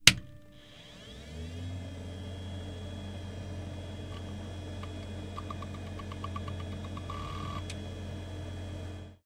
External-storage-enclosure-switch-on-and-hard-drive-spin-up
Sound of the enclosure being switched on and the drive spinning up to 5400 rpm, going through its head alignment procedure. Noisy recording with cooling fan and case sounds.
Storage, Hard-Disk-Drive, Datacenter, Office, Fan, Computers, Cooling-Fan, Business, Retrocomputing, Old, 1990, Disk-Drive, HDD, Computer, Computer-Fan